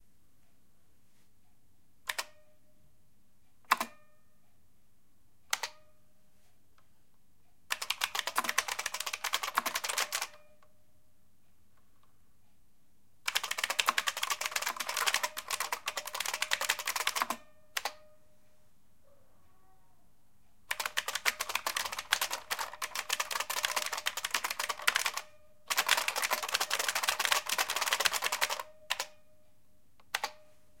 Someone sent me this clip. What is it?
buckling spring keyboard typing
Typing at various speeds on a 1981 IBM Model F buckling spring keyboard.
keyboard-typing, hacking, buckling-spring, typing, key-press